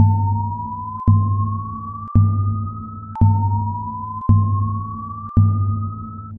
I generate drum bass six times and whistle two times, and I apply reverberation.